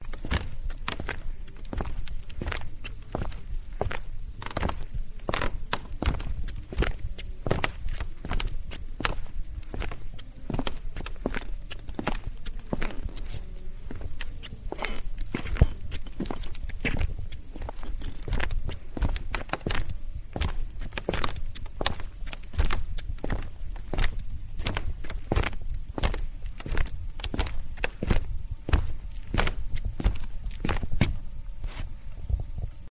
live recording of walking on a creaking, wood floor
field-recording, floor, footsteps, walk, wood